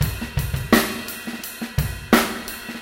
beat - ride - 16s - heavy - efx
A drum beat, kinda heavy. Compressed. Straight.